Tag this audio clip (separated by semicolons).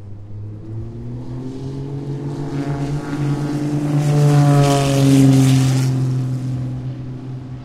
red-bull; airplane; propeller